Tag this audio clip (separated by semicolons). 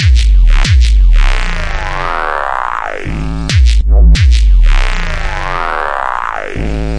bass; processed